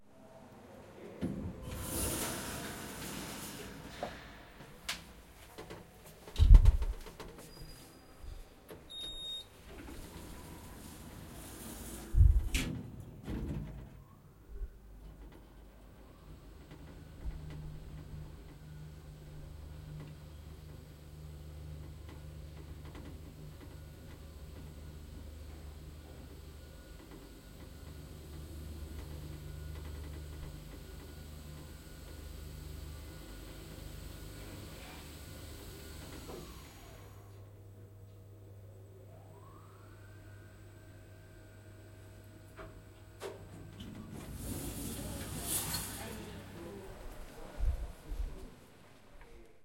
Sound of an elevator recorded at UPF Communication Campus in Barcelona.
campus-upf, elevator, elevator-door, UPF-CS14